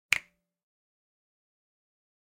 Real Snap 3
Some real snaps I recorded with an SM7B. Raw and fairly unedited. (Some gain compression used to boost the mid frequencies.) Great for layering on top of each other! -EG
real-snap, snap, percussion, finger, sample, finger-snaps, snap-samples, simple, snaps